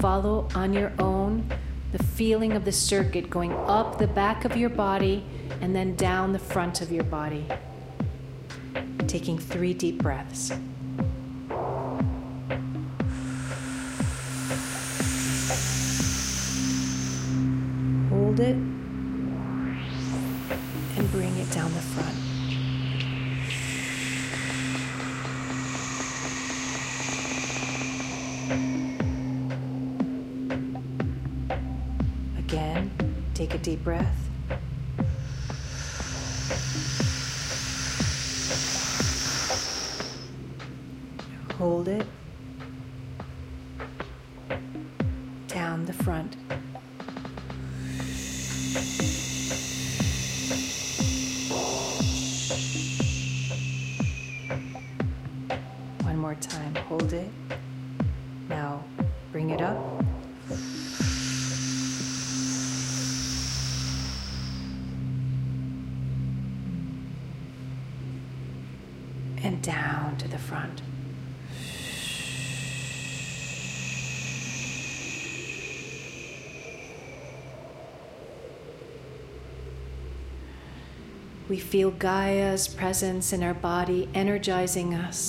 Microcosmic Orbit with beats
this is from the guided meditation sessions i do with my partner. this one is at 60bpm
chakras, drone, white-noise, guided-meditation, 60bpm, relax, ambient, meditation